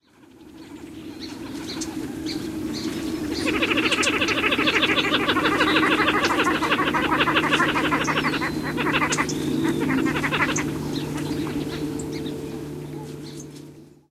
ag21jan2011t17
Recorded January 21st, 2011, just after sunset.
american-coot, sherman-island